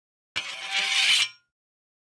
Sliding Metal 05
blacksmith, metal, shiny, clang, rod, metallic, slide, shield, steel, iron